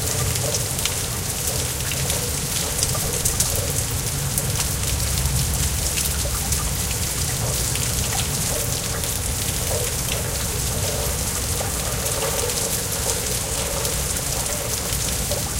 Rain Pouring 03 LOOP
April showers outside my house in Leeds. Recorded with Microtrack 2496, 7th May 2006.
heavy, pour, pouring, rain, splash, splish, storm, water, weather